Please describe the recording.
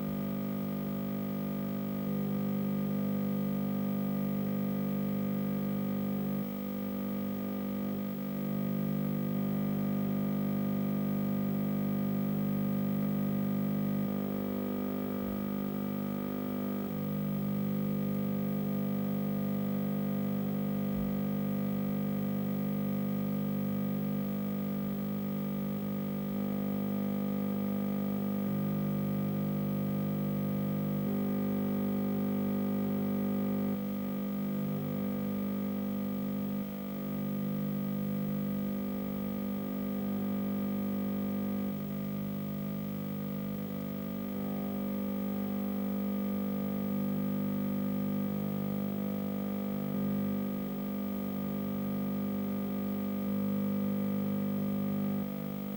Recording of the defective line transformer of the CRT of an old TV set.
Can be used for SFX and as a nice sample base for diverse lead wavetable sounds.